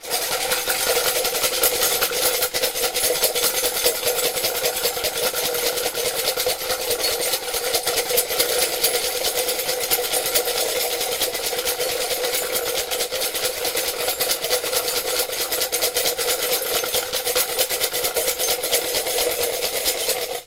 This is a foley of a car motor it was done with forks on a plastic cup, this foley is for a college project.